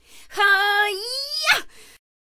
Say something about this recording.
foley girl-karate
girl karate foley
girl, foley, karate